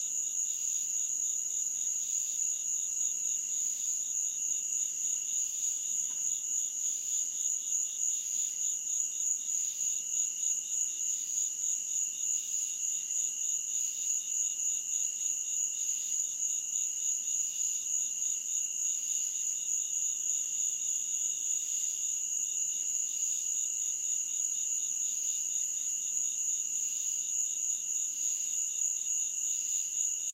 Cicada Insects 8 25 13 10 09 PM
Short field recordings made with my iPhone in August 2013 while visiting family on one of the many small residential islands located in Beaufort, South Carolina (of Forrest Gump, The Prince of Tides, The Big Chill, and The Great Santini fame for any movie buffs out there).
humid, insects, cicadas, nature, field-recording, crickets, summer, frogs, hot, forest, semi-tropical, jungle, USA, tropical, birds, day, insect, Beaufort, night, low-country, South-Carolina